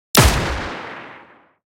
Layered sounds together from synth, shotgun, gun echo sample, kick drum, white noise and other textures to form a scifi weapon.
Processed with Multiband compression, eq, amp distortion, parallel compression.
Made in Bitwig.
trek, gun, science, fiction, bladerunner, laser, blaster, war, futuristic, weapon, scifi, space, sci-fi, star, wars